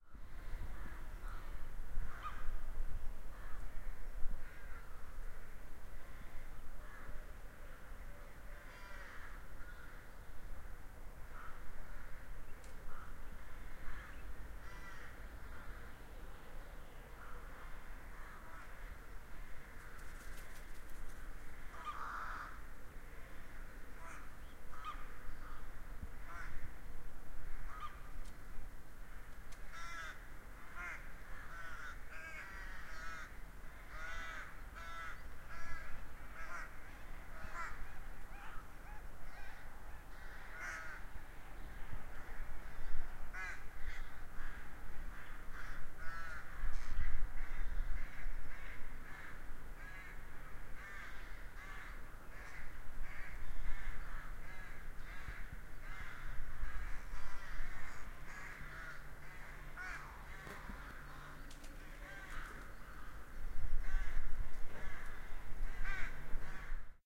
Suburb Ambience, crows and other birds
Suburban ambience with a dozen crows and other birds, at spring morning, distant traffic.
birds, crows, suburb, town